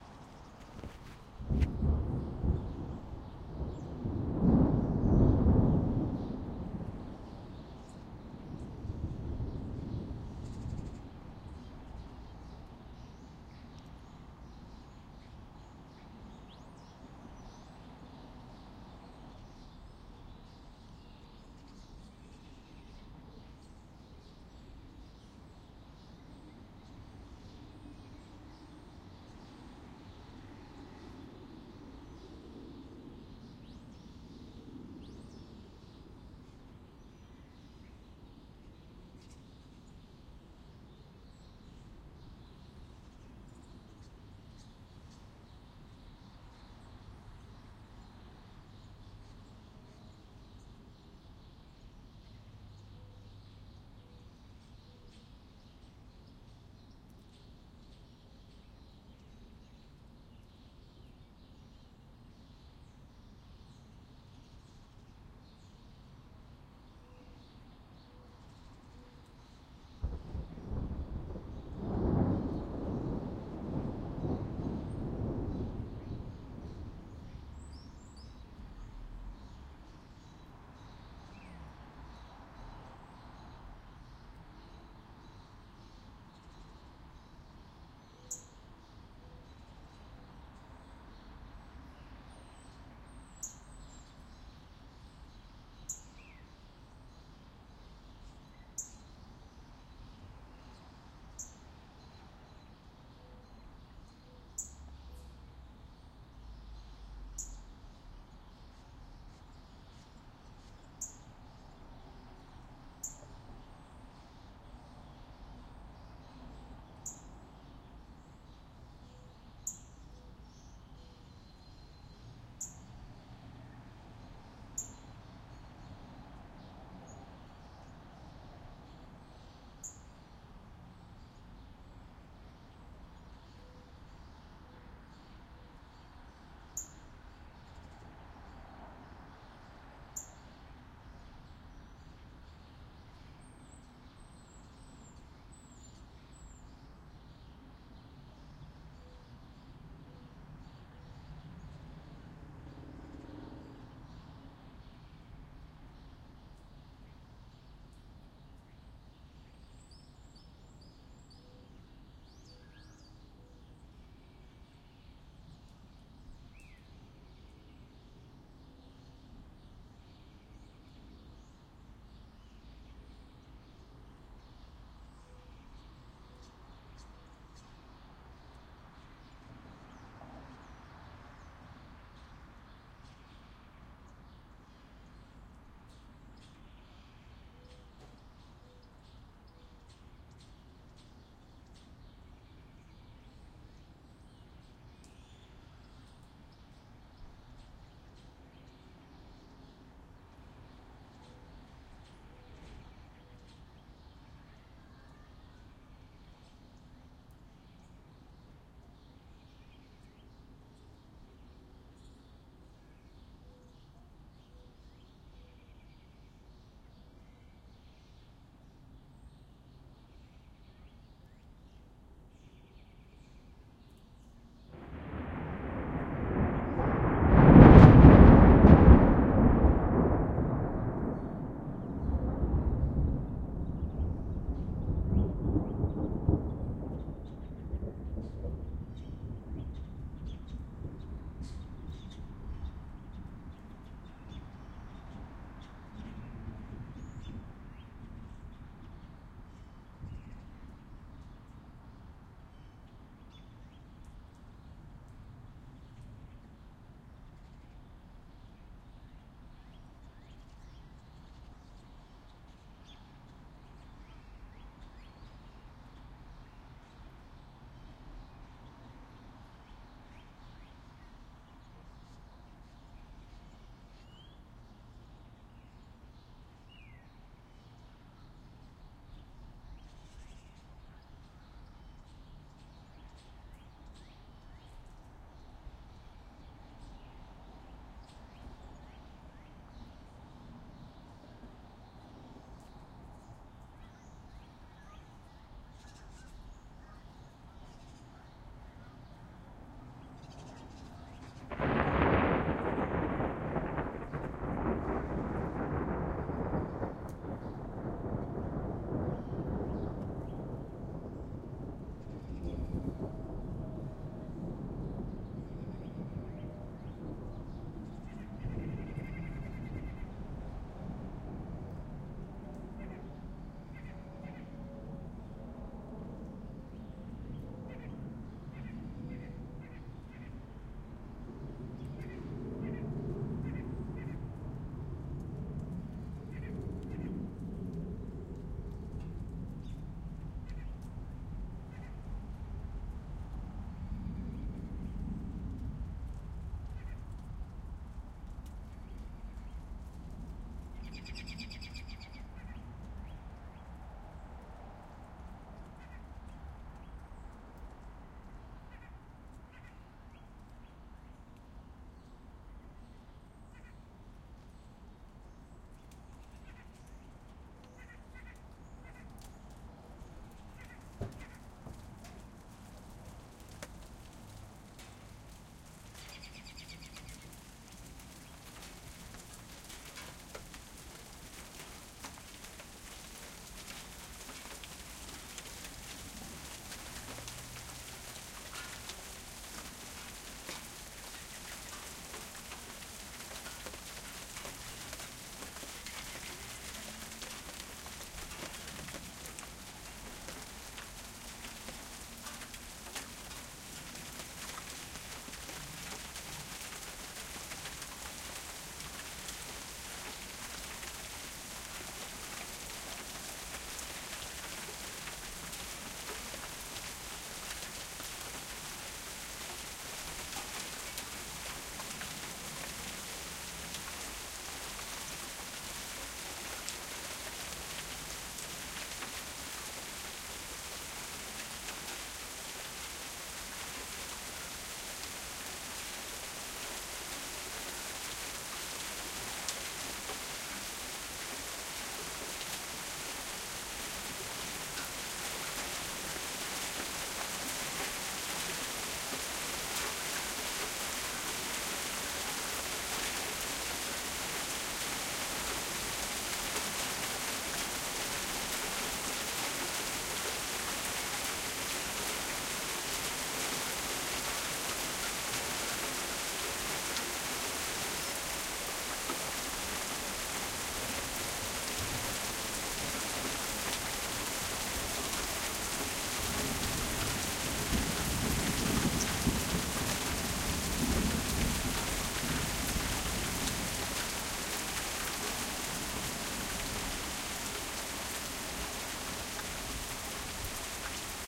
A Thunderstorm Arrives in Suburban NJ
The sound of birds and distant thunder awoke me from a long nap, and so I sprung off the couch and grabbed my recording equipment, dashing outside just in time to record the arrival of a thunderstorm. Over the course of this clip, the storm gets closer and closer, until it starts to rain with increasing intensity. The clip is nicely unmolested by wind, and contains a nice background of birds. A tremendous thunderclap is featured in the middle, three minutes in.
ambient, robin, rainstorm, nature, rain, EM172, weather, H1